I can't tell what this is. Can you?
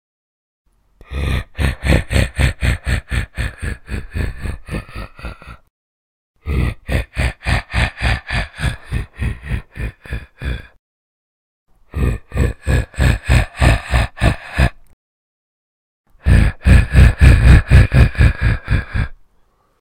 Monstrous Laugh 2
Some horror sounds I recorded.
Thanks very much. I hope you can make use of these :)
scary, ghost-laugh, ghost, evil-laughing, disturbing, laughing, creepy, haunting, evil, horror, laugh, horror-laugh, scary-laugh